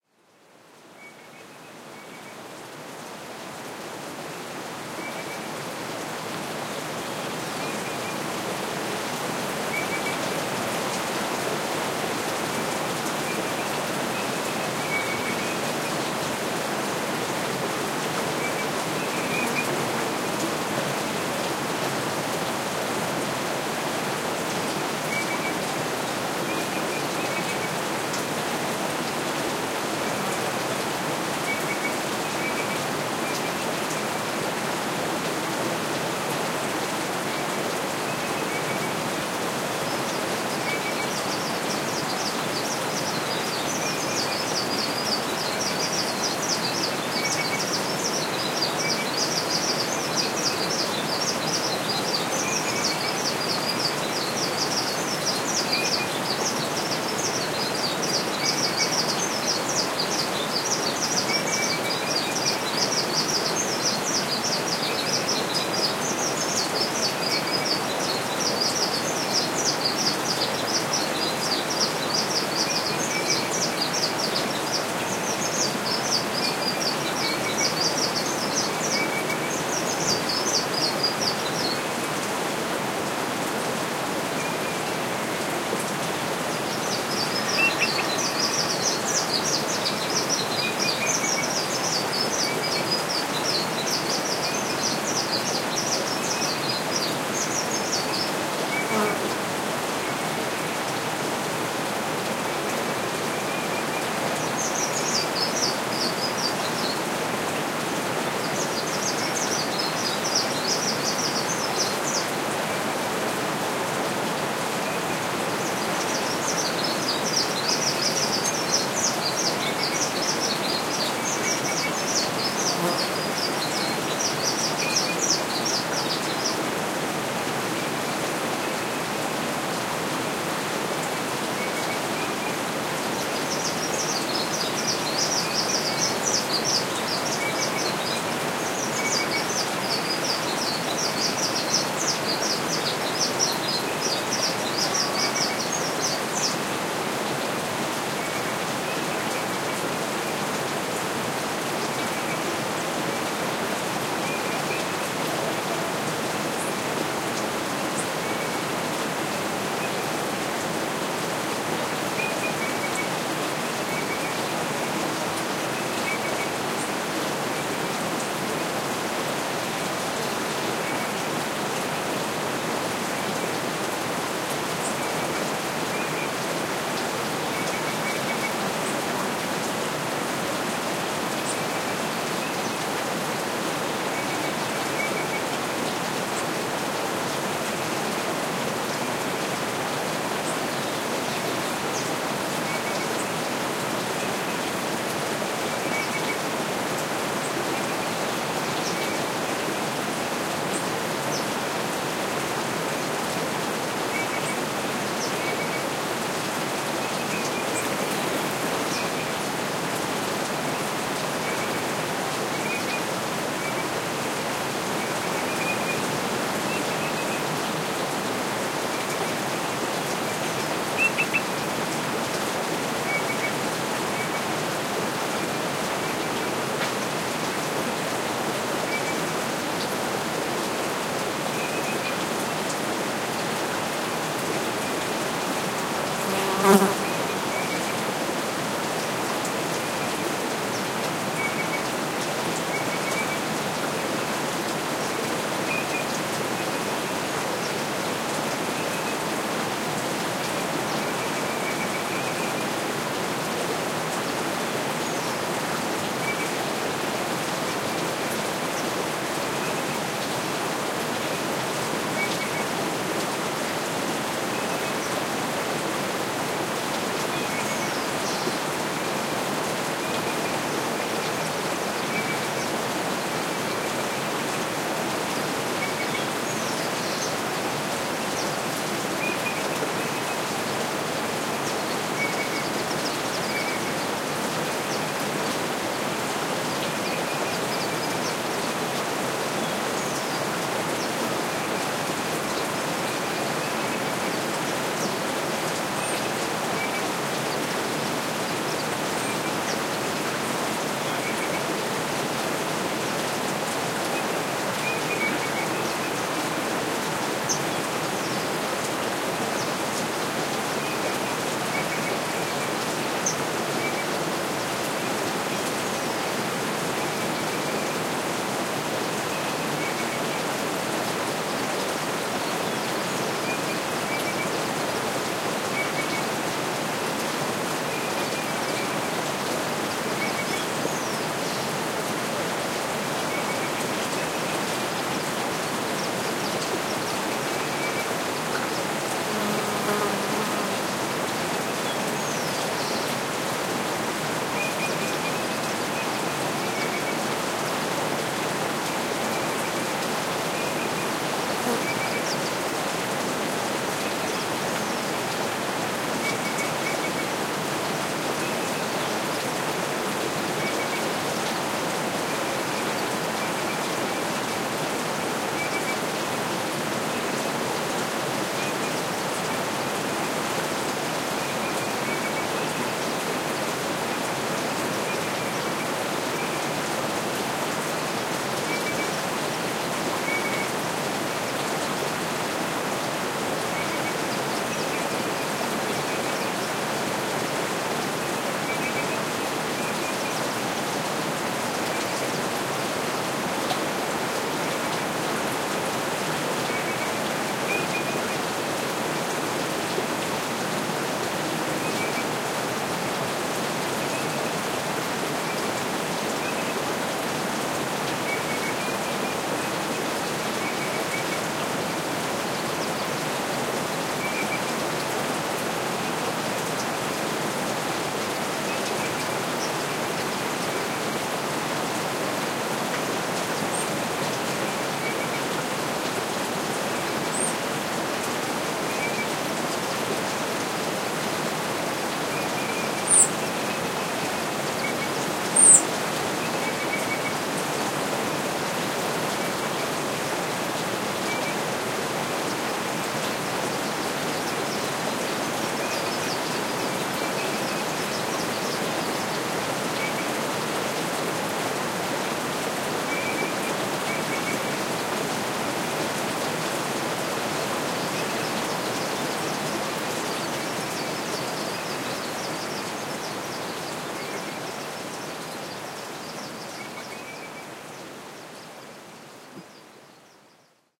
Inca Trail Cloud Forest
Cloud forest ambient field-recording. Made along the Machu Picchu Inca Trail between Pacamayo and Runkuraqay, Peru
ambient, Andes, bird-call, birds, bugs, cloud-forest, environment, equator, field-recording, forest, high-altitude, hike, Inca, Inca-Trail, insects, Machu-Picchu, mountain, mountains, nature, nature-sounds, Pacamayo, Peru, rainforest, Runkuraqay, South-America, stream, trek, water